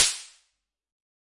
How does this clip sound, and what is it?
Living Room Impulse
This is an impulse response of my the dining room in my house. I used a Christmas cracker to make the bang.
I've bought a Zoom H4n Pro so I can make better recordings. This particular sound was recorded with it. I edited the sound with Audacity and Wavelab LE.
I also have a pack with more impulse responses in it if this sort of thing interests you. Thank you!
reverb, convolution, inside, home, impulse-response, atmosphere, house, room, bang, IR, dining, indoor, living, crack